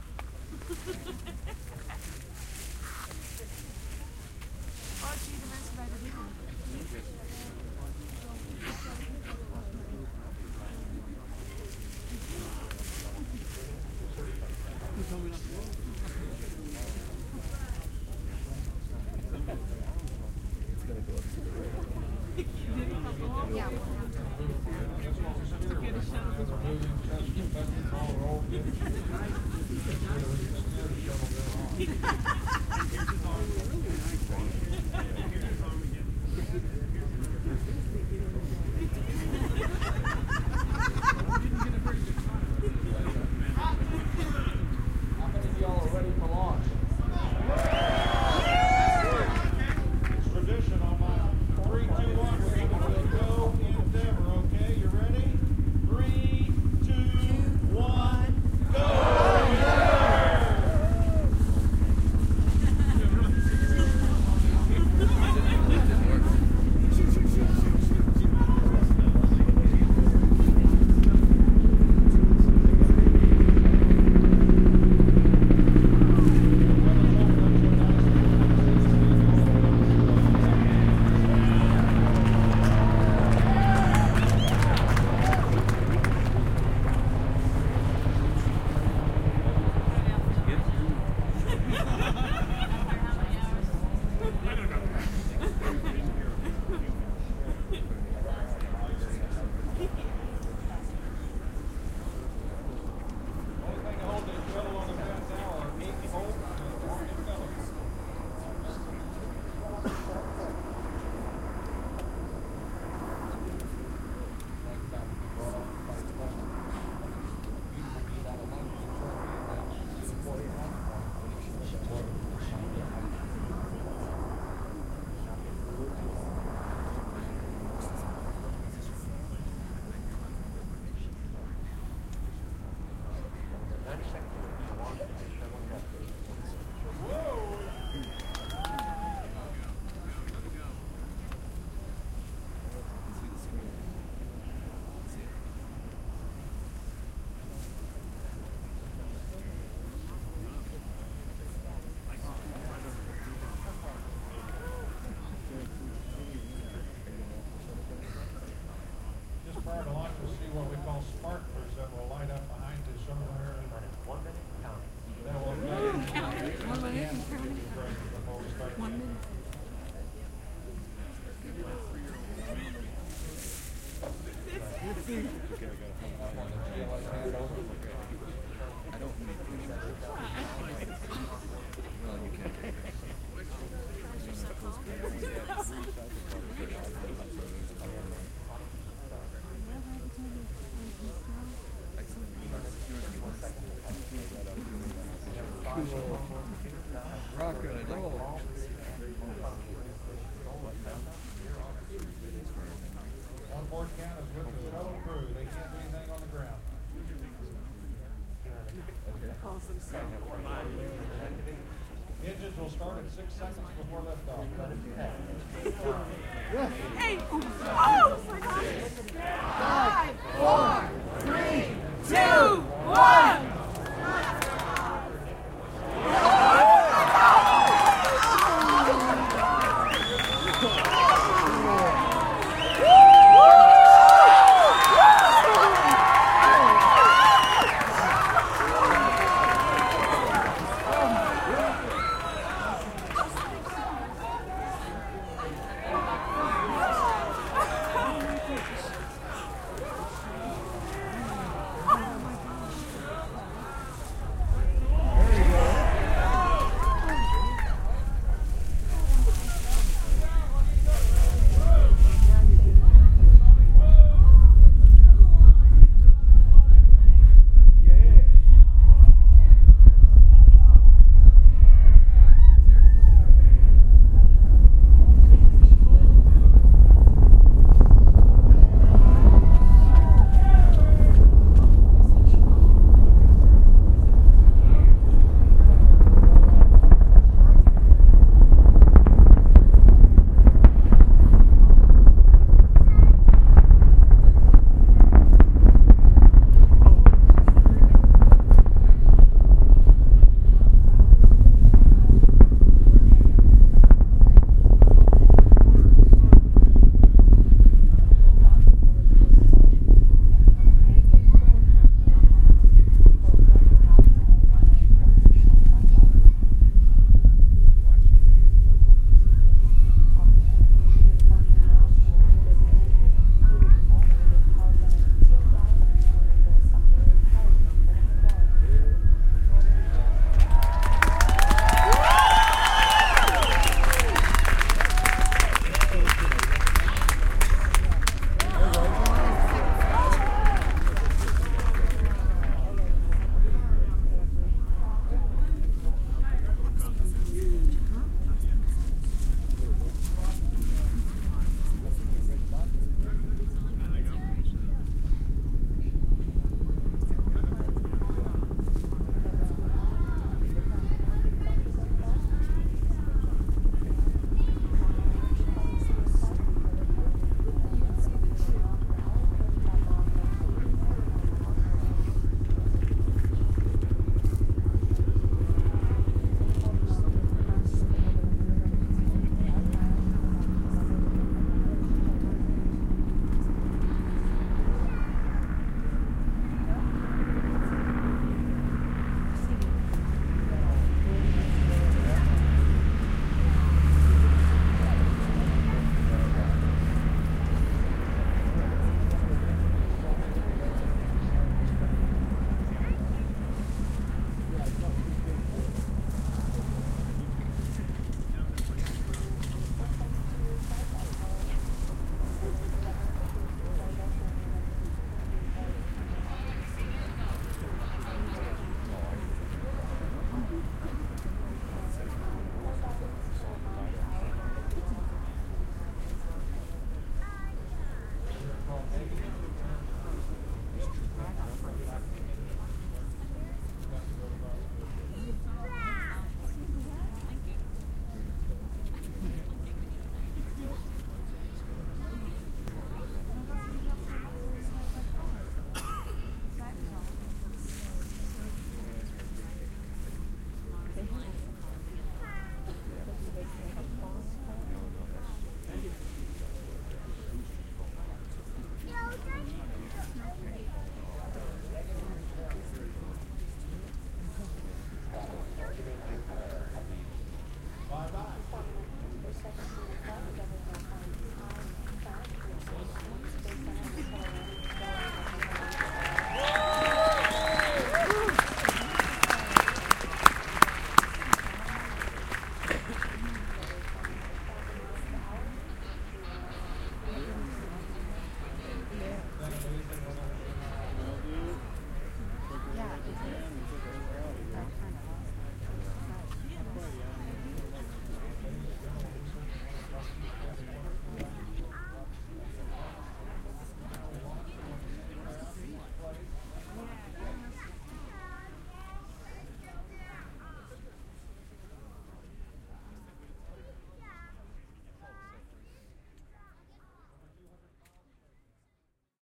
Bob Ulrich Shuttle Launch
This is a field recording, from the observation area, of the Space Shuttle STS130 launch of 2/8/2010. It includes crowd noise before and after the actual launch itself. Launch time was 4:14 AM, EST.
Bob Ulrich made this recording using a mini-disk recorder and a Crown SASS-P MKII Stereo PZM microphone.
ambience, launch, shuttle